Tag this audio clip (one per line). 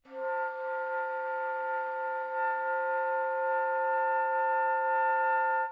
smith
howie
multiphonic
sax